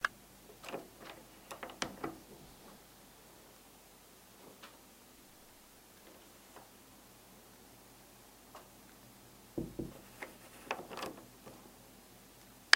Open/close door quietly 2
A recording of someone opening and closing a door quietly. Perhaps he/she is trying not to wake someone as they intrude or maybe they are checking on their sleeping baby. Either way, this is a great audio clip to use for a movie or video.
shutting, close, shut, quietly, wooden, opening, closing, door, doors, open, quiet